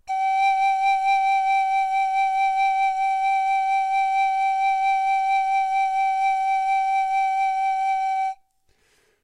long tone vibrato pan pipe G2